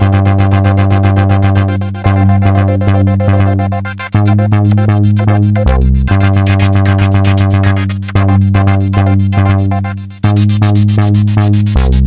Synth Loop
A synth bass-and-lead loop. Made using a Kaossilator Pro.
keyboard, loop, music-loop